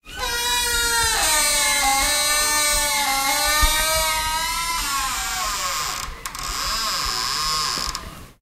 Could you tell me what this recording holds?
A horroble door creak in a large corridor.